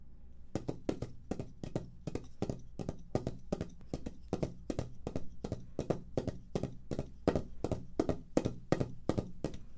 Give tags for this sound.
animal
grama
correr

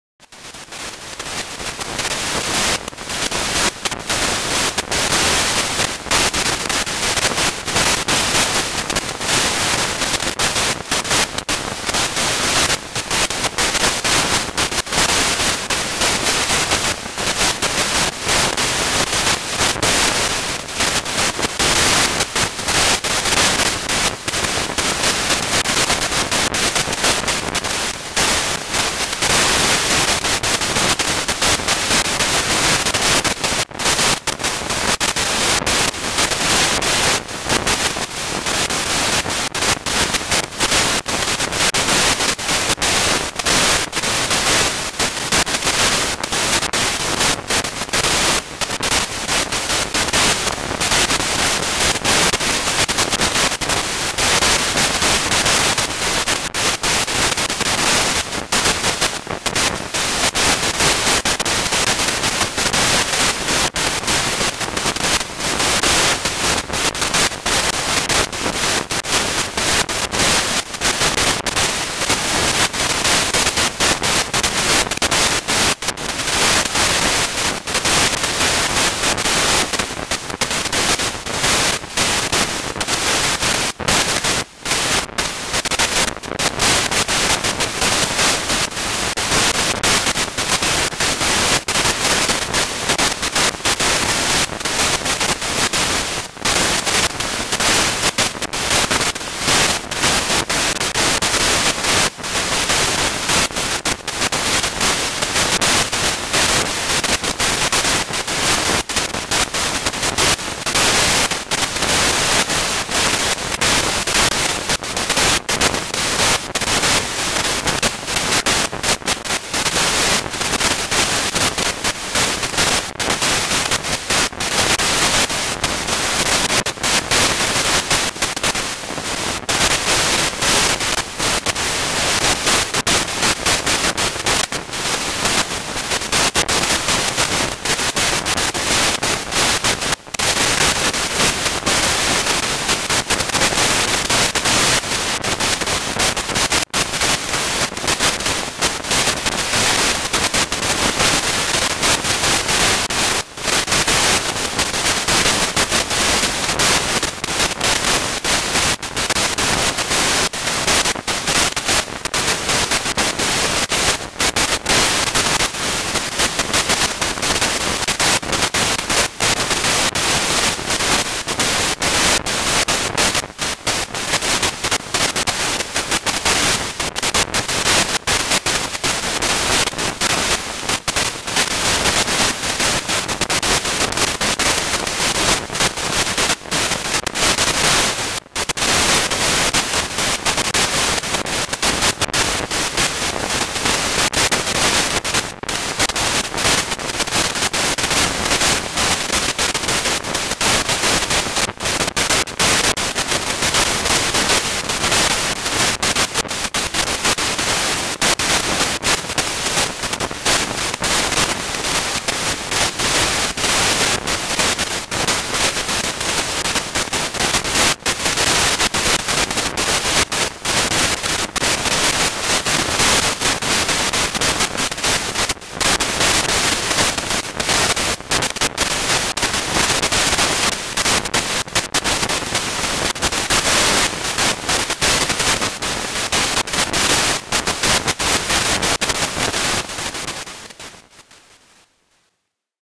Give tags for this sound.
static
receiver
foley
radio
sputter
noise
crackle
disturbence
antenna

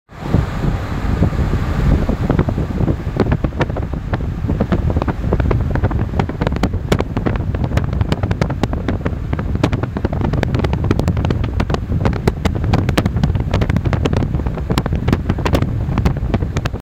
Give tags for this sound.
wind
gust
windy

